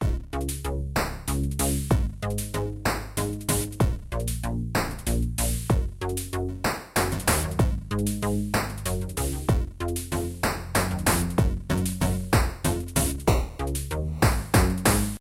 A happy synth loop in a 3/4 waltz.